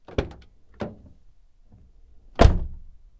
Cardoor, open-close
A car door that opens and closes.